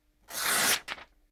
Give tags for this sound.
paper tear tearing

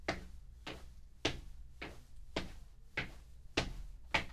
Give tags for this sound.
Foley Steps

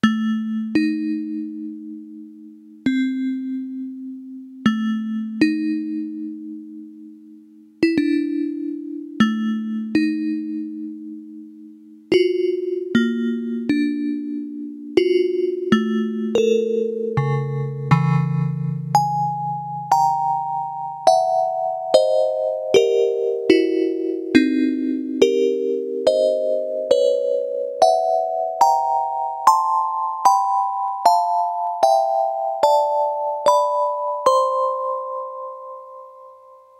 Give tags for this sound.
FM-synthesizer Keyboard Yamaha

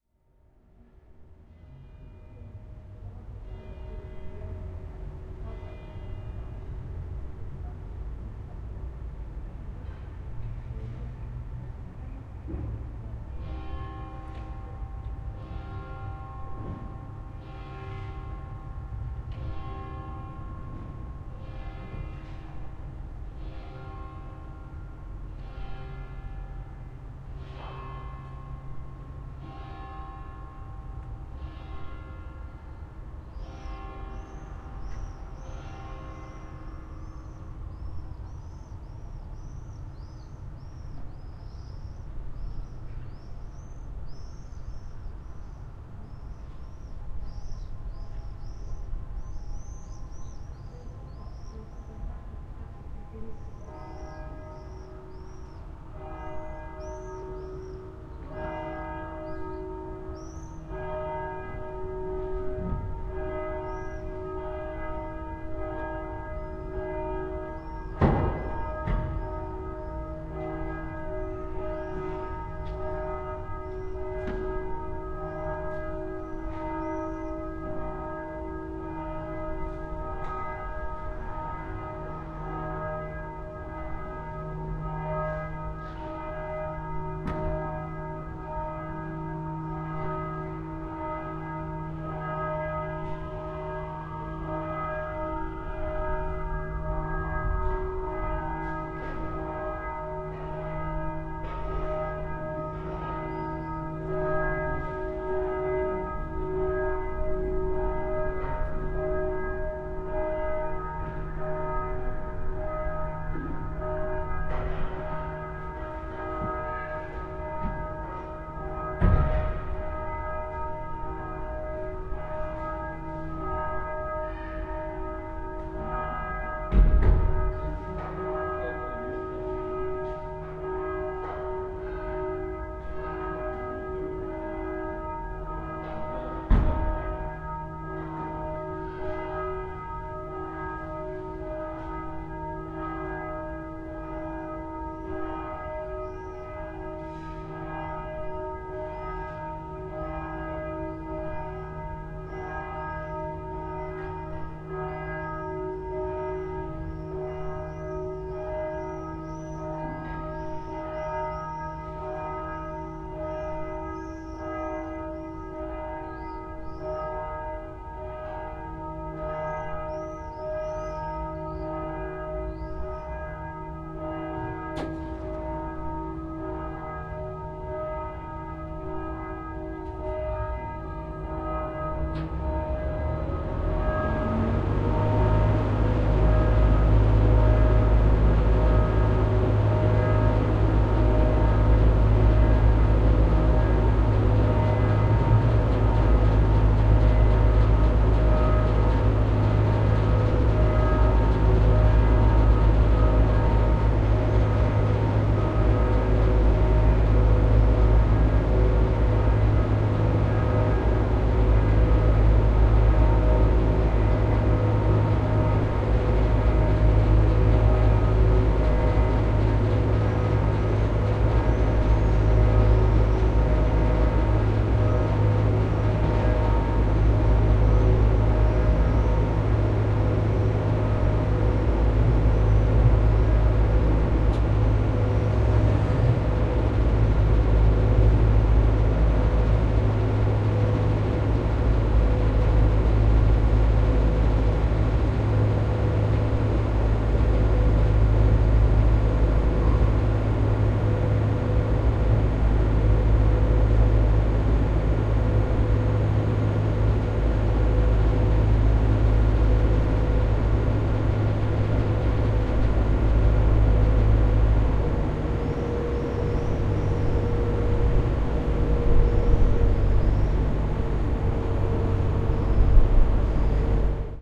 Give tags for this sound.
noise birds

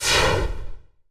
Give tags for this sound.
steam exhaust

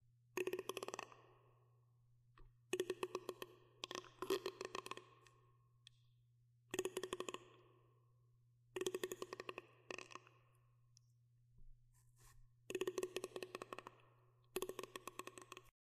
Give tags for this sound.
alien ambient animal creature croar gutural noise rana ruido ser

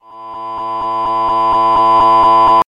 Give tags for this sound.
effect horror scare

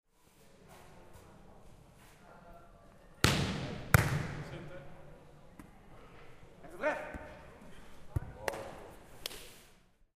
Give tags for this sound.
ambiance ball beach beachball beachvolleyball hall hitting indoor inside sport volley

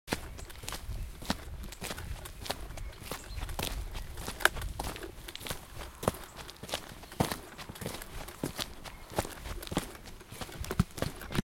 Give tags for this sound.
noise walking ambient steps

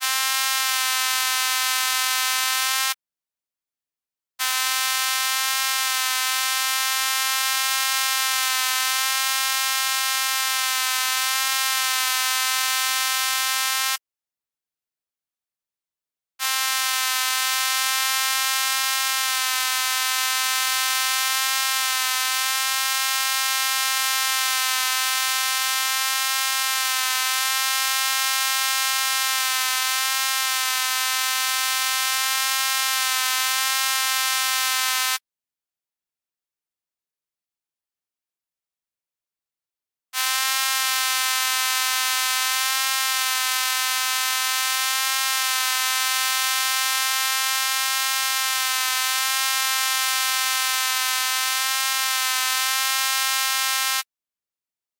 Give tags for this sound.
noise hum insect Fly moskito annoying

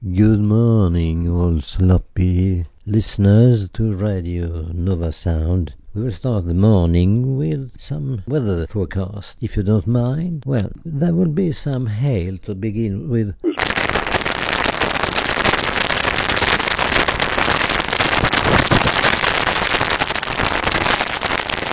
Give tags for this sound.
forecast hail speakerr sudden

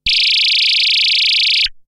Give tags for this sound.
70s effect fiction moog prodigy retro science sci-fi space synth synthesiser

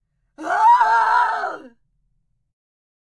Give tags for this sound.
voice scream human fear vocal female horror woman

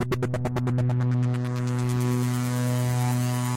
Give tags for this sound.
synth hard fast trance loop club free sound

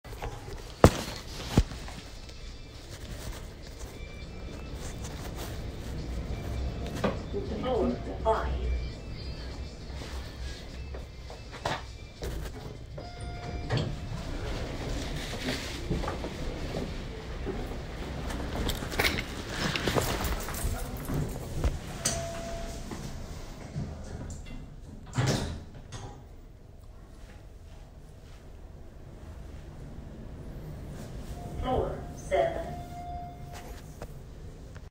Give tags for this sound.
Elevator BMCC

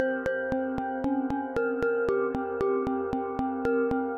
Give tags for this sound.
electronic instruments keyboards riffs synth

